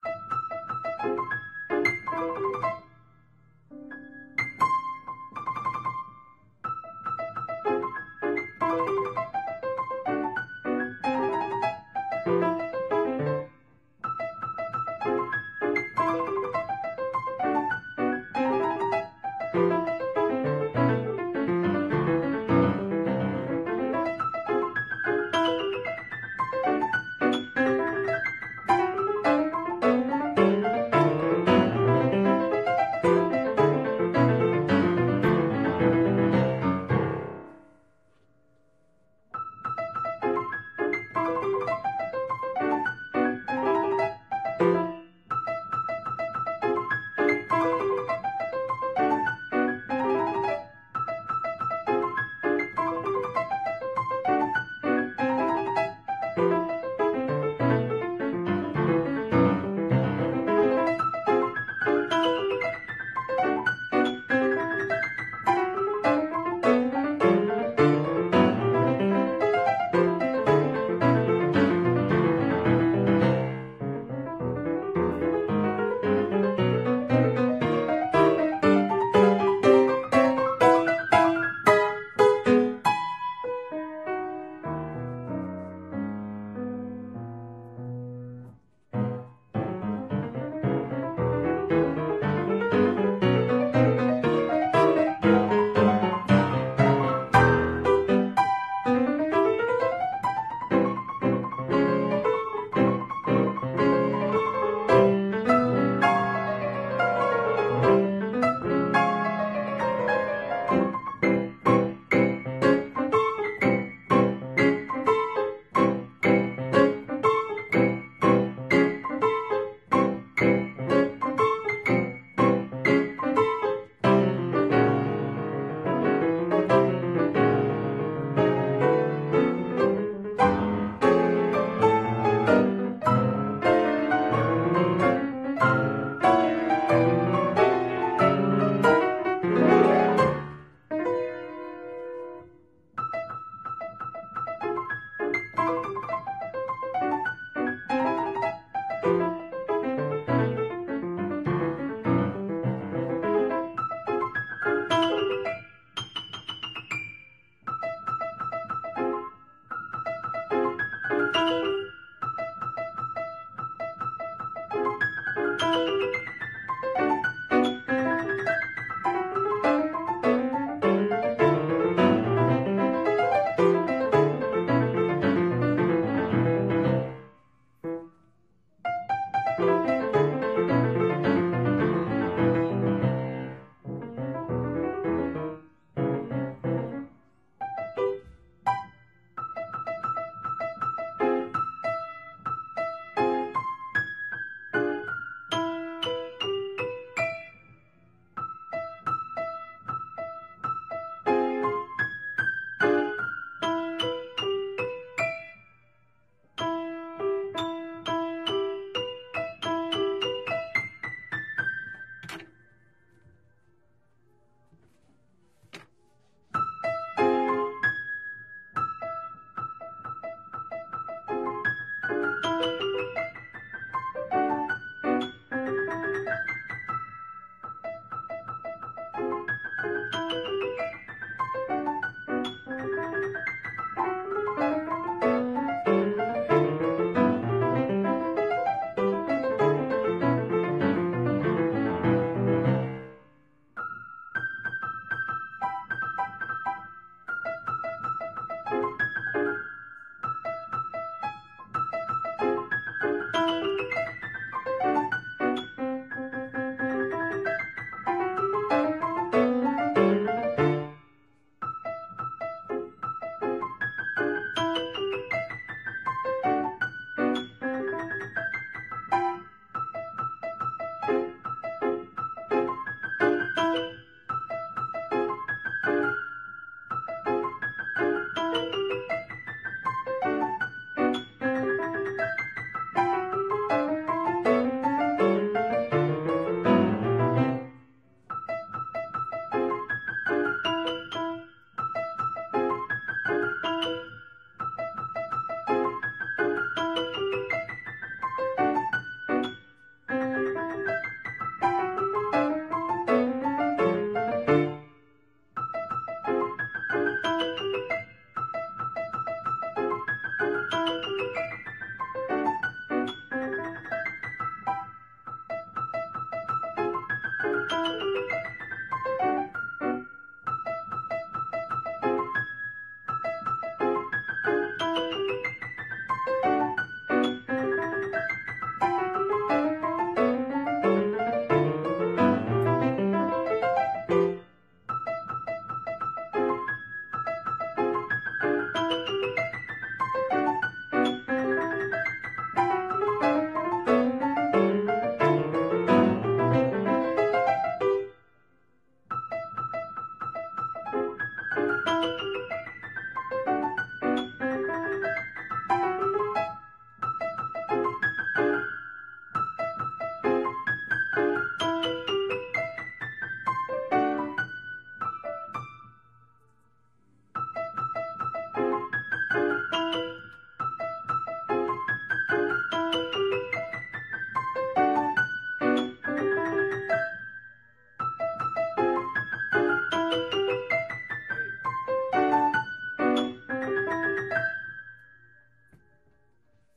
Practice Files from one day of Piano Practice (140502)